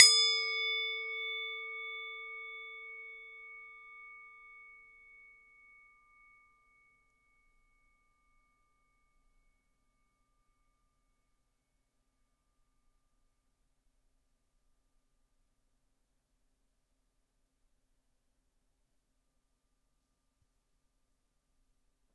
Singing bowl struck